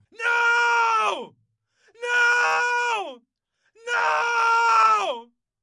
Scream NO - Man
Desperate screaming man